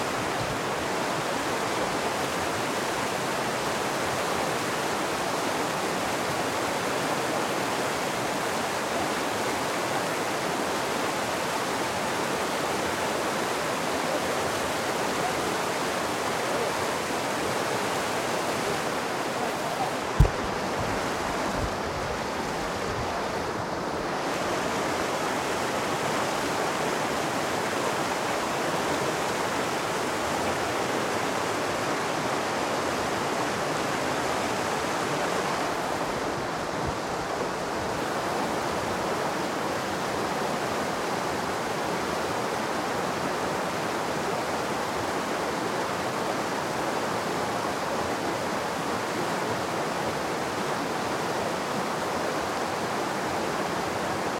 WT - river
recording of edrada's river flow